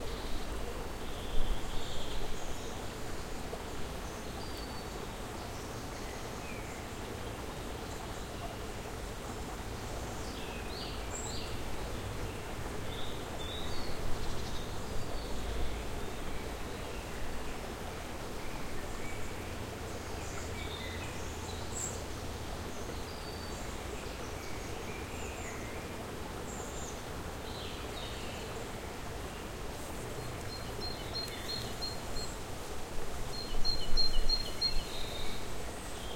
Brittany forest ambience #2
birds, field-recording, forest, nature, trees, water, wind, woods